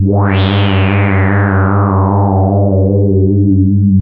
Multisamples created with subsynth. Eerie horror film sound in middle and higher registers.